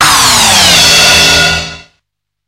cymbal sample from Proteus FX distorted via Boss GX-700
beat, crash, cymbal, distorted, distortion, drum, fx, proteus, sample, trash, tube